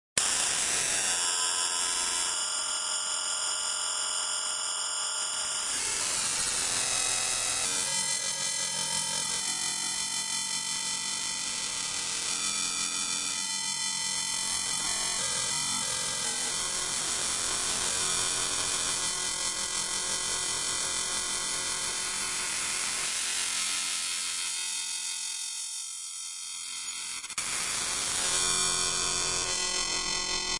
Material from a waldorf Q processed in Live with a Waldorf Dpole, the UAD Helios69 EQ and Neve Compressor. Gritty Low Fi shifting, high frequency LFO on a BPF. Hard digital distortion effects.
bitcrusher, decimator, dpole, fm, hard, helios, microq, neve, uad, waldorf